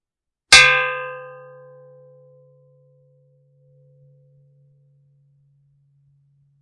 Metal Bowl Sound.